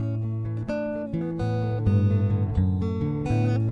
Guitar notes arpeggiation - Key A - Looped
Guitar tuned one third down - Standard D formation.
Yamaha acoustic guitar heavy gauge strings.